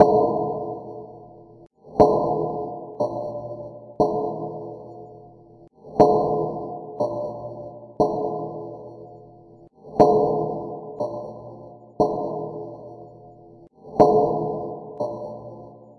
Anvil loop tuned lower
Various loops from a range of office, factory and industrial machinery. Useful background SFX loops